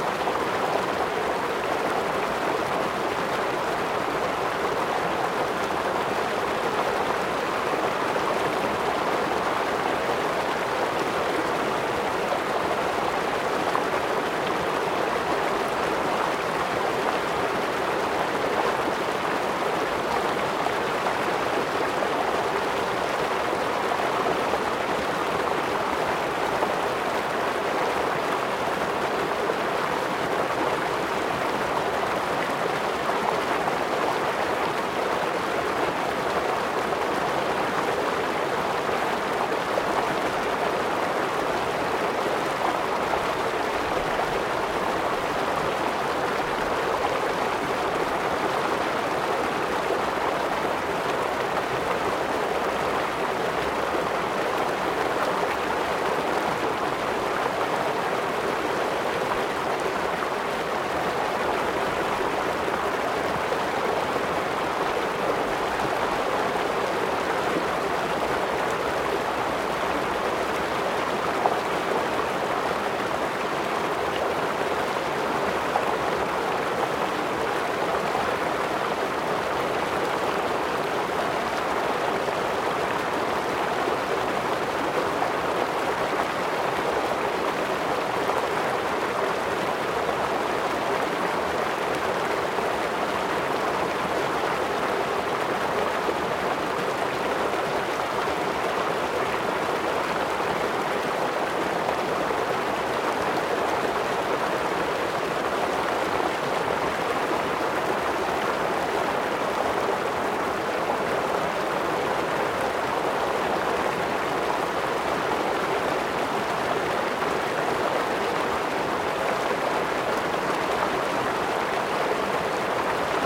Medium River 2
River sound recorded with a Zoom H4n
field-recording, nature, water, zoom, flow, stream, river, Medium, h4n, liquid, flowing